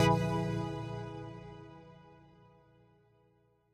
12 ca chord
pitched up hit could use for a happy hardcore riff
chords, hits, one, samples, sounds, synth